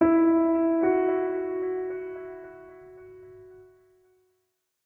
Two tones, a major second up, part of Piano moods pack.